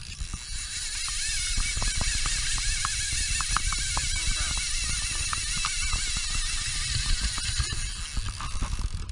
Fly Fishing Reel Running 5
A Hardy Angel fly fishing reel pulling line at fast pace. Recording outdoors so addtional background noise can be heard.
clicking, field-recording, fishing, fly, pulling, reel, running, turning, winding